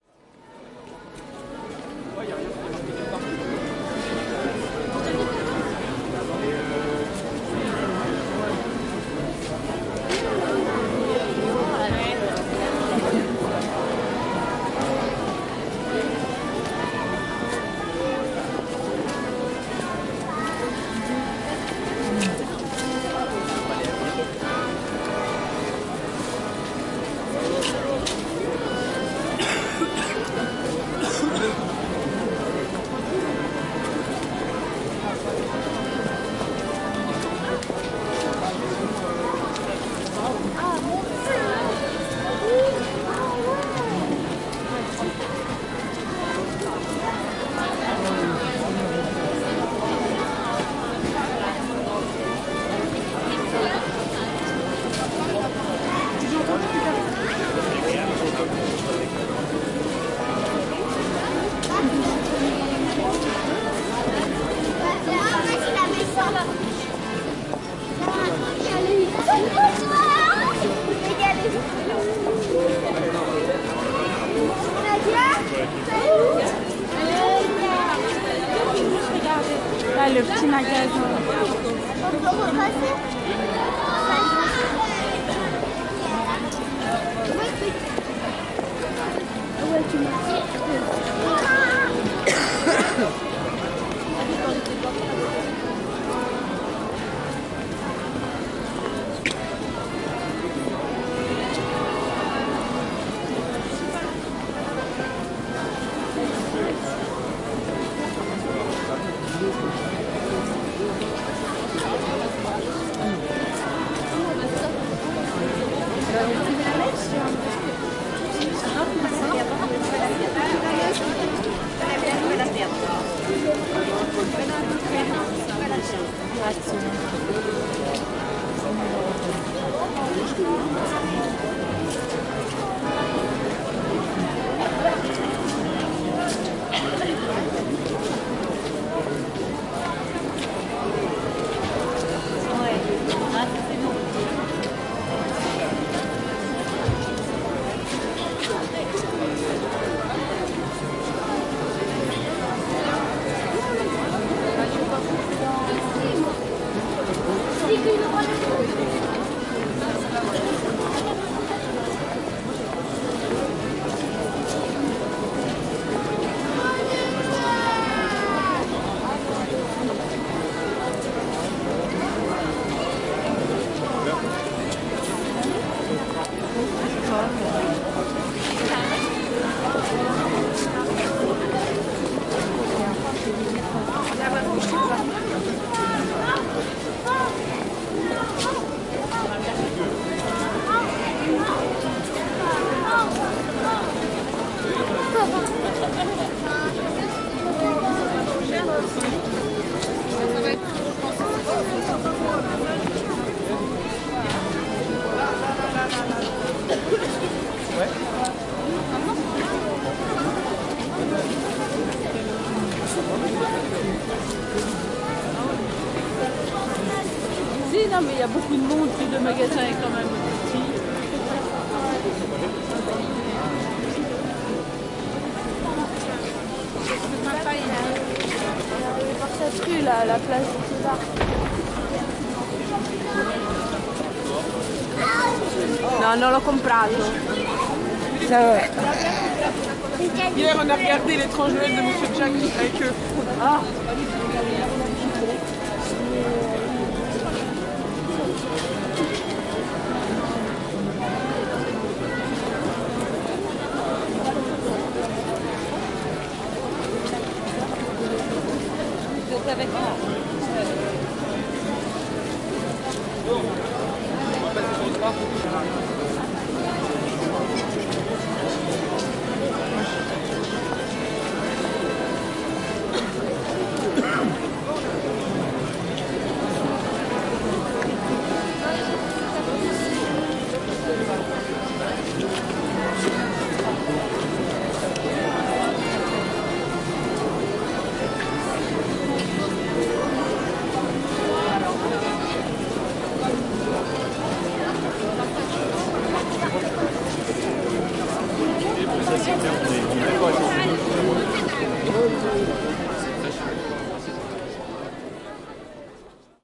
The ambiance of the famous Strasbourg christmas market, known as one of the oldest in the world (first edition took place in 1570) and the city's biggest touristic event, gathering thousands of people downtown for one month at the end of every year. I took my zoom h2n in different places, capturing a slightly different mood each time. expect lots of crowd sounds, background music, street atmosphere and... a lot of different languages (french, german, spanish, english.... All recordings made in MS stereo mode (120° setting).
Christkindelsmarik, christmas-market, ambiance, city, crowd, talking, Strasbourg, street-ambience, languages, international, marche-de-noel, people, Alsace, market, France, field-recording